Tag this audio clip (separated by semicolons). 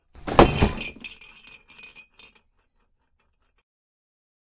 smash; shatter; glass; box; christmas; slam; breaking; break; ornaments